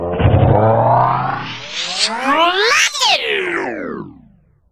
U picku materinu! Serbian slang edited to a fascinating sound.
machine, materinu, picku, serbian, start, starting, U, vocal, wobble